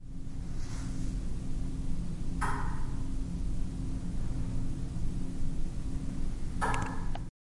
environment room
Environment of an empty room with some aircon noises. Recorded with a tape recorder in the library / CRAI Pompeu Fabra University.
room, library, campus-upf